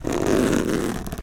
A tiger's roar
animal, roar, tiger